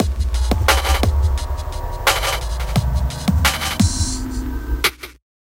DnB Shifter Beat
A beat made in Logic Pro 9 using Apple Loops (DnB loops) and a bunch of effects and other fun stuff, Enjoy!